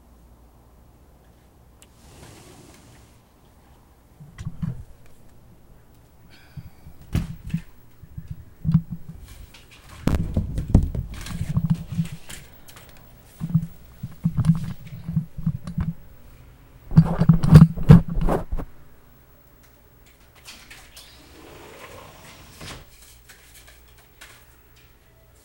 Snippet 2 of the USB mic stand banging around during setup on stealth recording recorded straight to laptop.